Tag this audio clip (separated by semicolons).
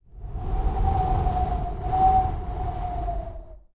field-recording; nature